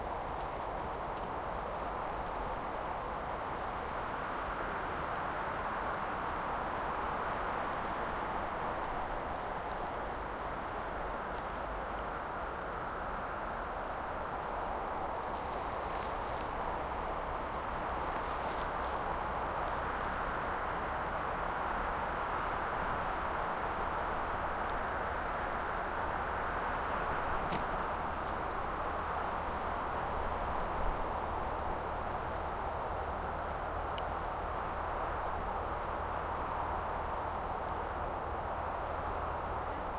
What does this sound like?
wind on Casuarina trees (Sheoak, Ironwood) along a coastal path near Tavira, Portugal. Bird chirps. Recorded with two Shure WL183 capsules into FEL preamp, Edirol R09 recorder. This sample is a tribute to my friend Ramon's father, who loved the noise of wind on trees and, specifically, on Casuarinas
beach, birds, casuarina, field-recording, nature, sheoak, shore, trees, wind